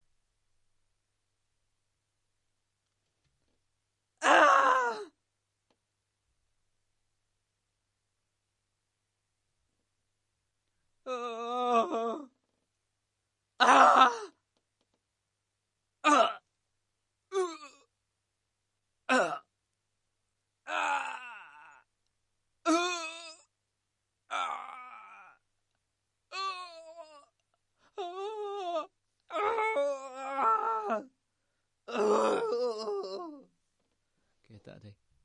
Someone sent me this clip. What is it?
More groans and screams
Various screams and groans of pain, fear and death recorded in the University of Sheffield journalism department's recording booth for a history slideshow about Joan of Arc.